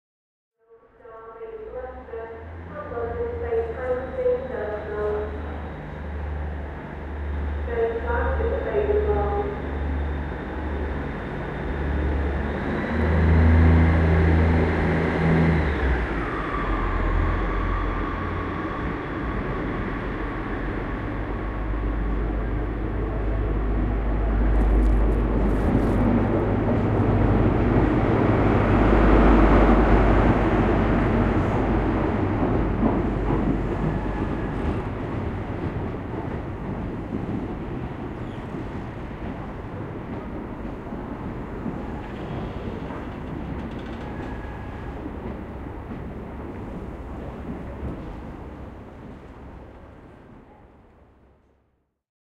2 08 train leaves
The sounds of a railway station announcer and a train leaving the station. Minidisc recording at Doncaster station, Yorkshire, England.
ambience, field-recording, platform, railway, station, station-announcement, train